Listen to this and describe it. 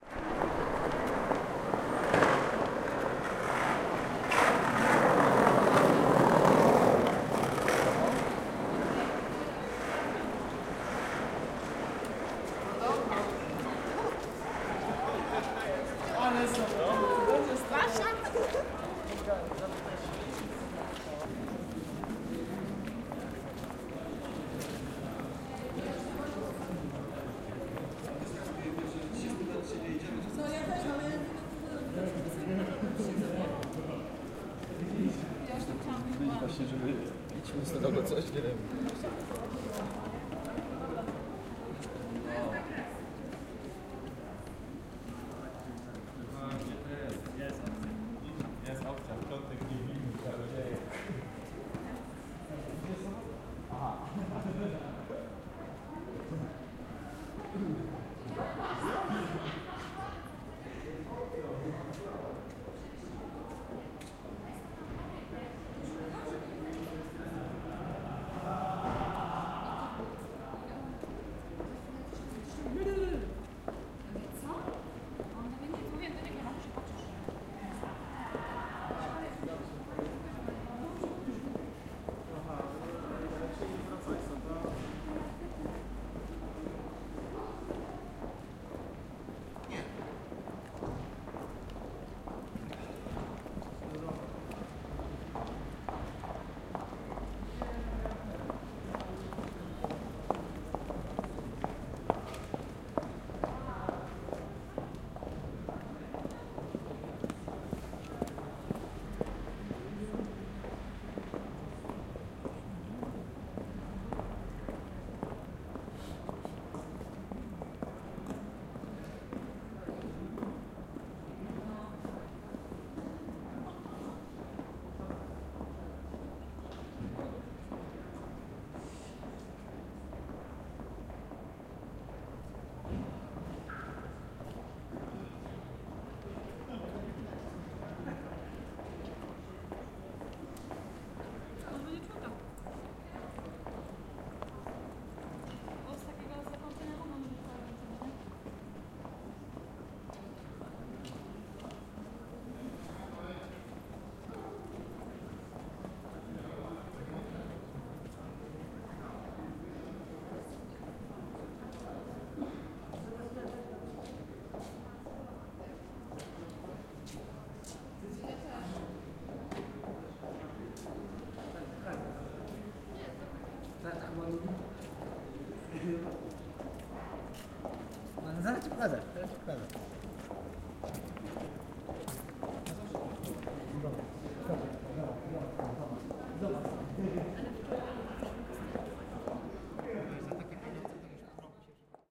22.10.2011: about 9 p.m. Polwiejska street (the famous promenade in Poznan). Ambience of the Saturday evening Polwiejska: walking people, skates, conversation - general hubbub.
ambience, boardwalk, field-recording, people, poland, poznan, promenade, skate, steps, street, voices